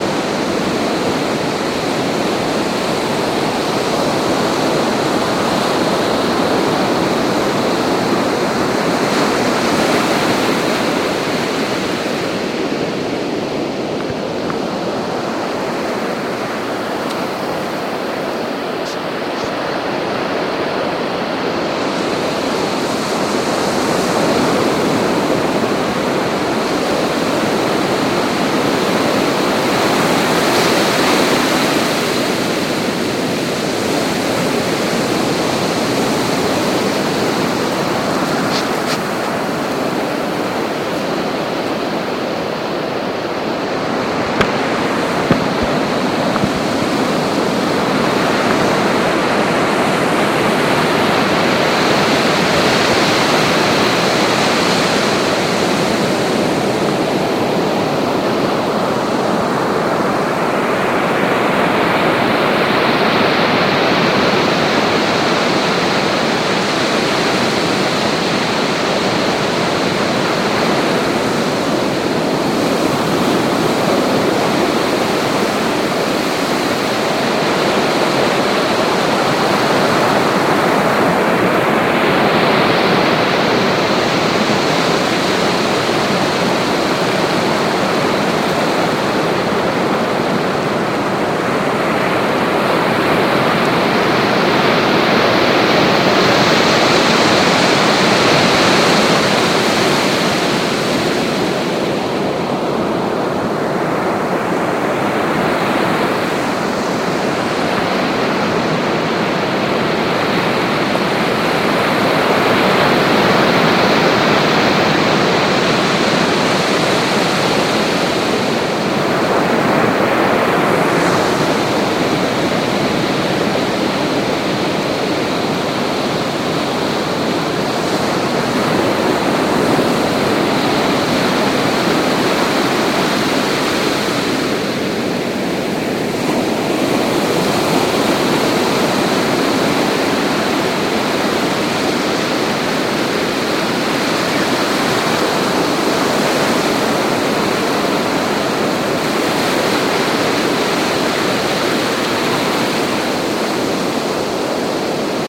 Dull roar of the ocean waves at the beach. Microphone: Rode NTG-2. Recording device: Zoom H6.